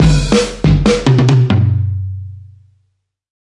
acoustic fills sound-effect